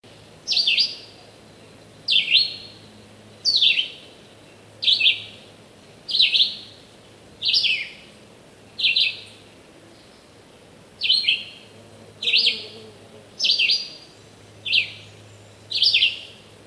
The red-eyed vireo (Vireo olivaceus) is a small American songbird, 13–14 cm (5.1–5.5 in) in length. It is somewhat warbler-like but not closely related to the New World warblers (Parulidae). Common across its vast range, this species is not considered threatened by the IUCN.